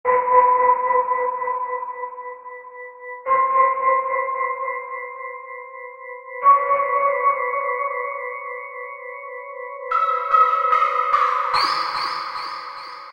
Just a Spooky Ambient track. Happy Halloween. This was created with GarageBand. Created on October 4th.
Thanks!
Spooky, HappyHalloween, Halloween, Ambient, Tension